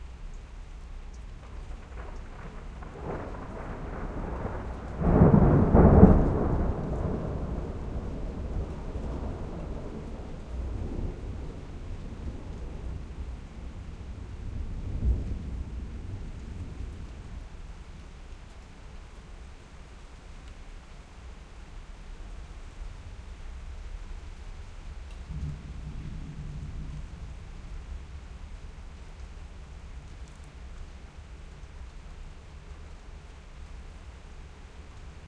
Captured with the PCM-M10's internal mics, some natural reverb from the location, no postprocessing